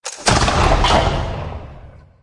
Perfect for bringing the ultimate immersion into glorious space adventures!
A collection of space weapon sounds initially created for a game which was never completed. Maybe someone here can get more use out of them.